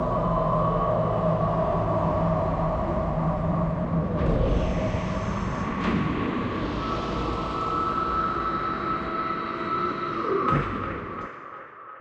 Mechanical Shutdown
A machine door shutting down